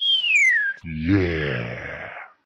MISSION SUCCESS!
Mission, Win